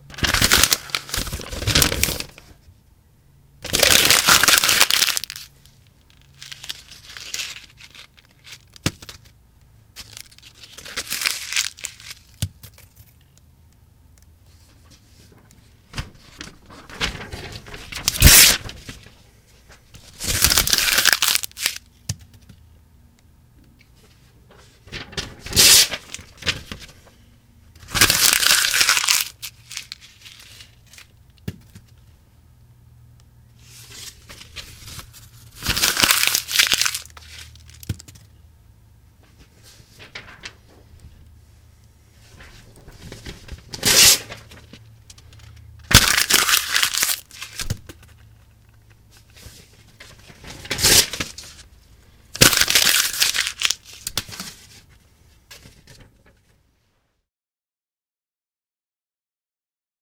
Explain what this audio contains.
paper crumble rip
Several variations of paper crumbling, ripping, and falling onto a carpet.
paper, tear, crumble, rip